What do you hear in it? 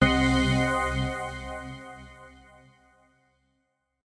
A synth horror sting